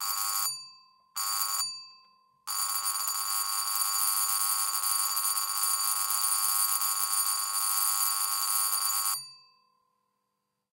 An old doorbell ringing, close perspective. Recorded in an apartment, close to the doorbell, with Zoom H4n Pro.